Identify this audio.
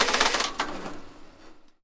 field-recording factory machines